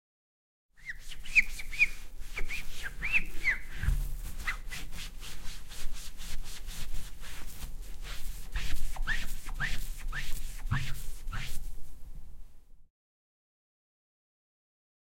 9 polishing the captains telescope
Pansk, Czech, Panska, CZ